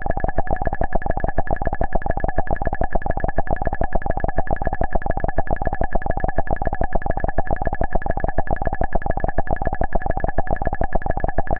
Machinery noise made in puredata.